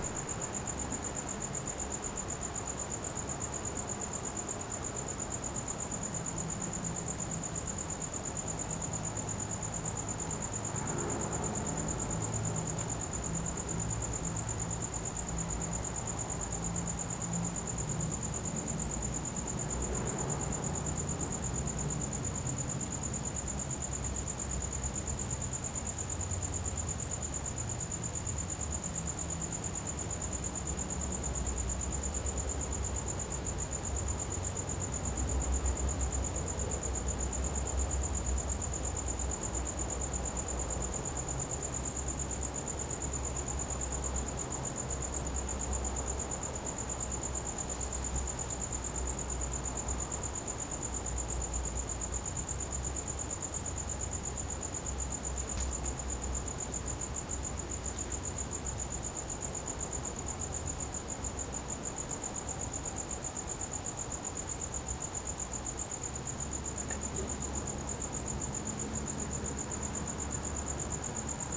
Night outdoor city with crickets Noche en exterior en la ciudad con grillos
Outdoor ambience with crickets by the night
ambiente, city, ciudad, crickets, exterior, grillos, Night, noche, outdoor